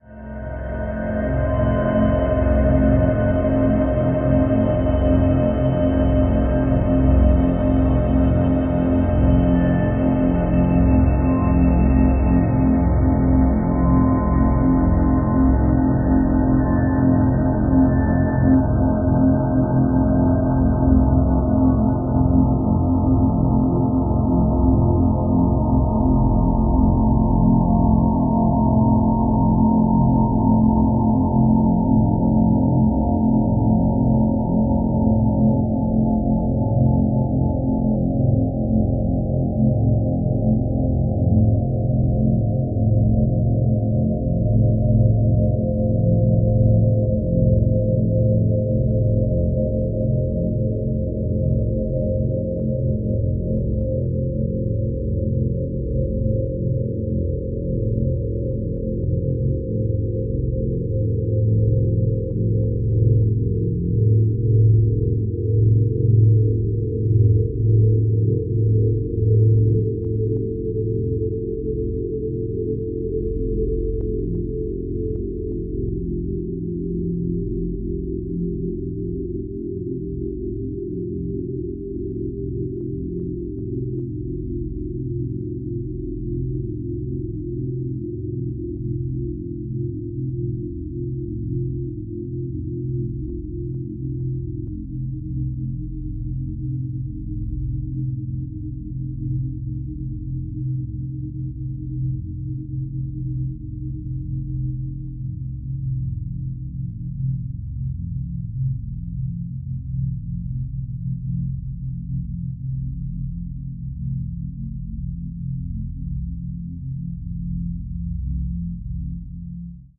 Dark ambient drone created from abstract wallpaper using SonicPhoto Gold.